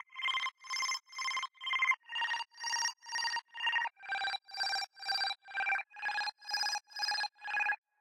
an 8 bit arpeggio made on Image Line's Harmor VST plugin(additive synth). using FL Studio as a host.
lobit, 8bit, arp, chiptunes, synth, arpeggio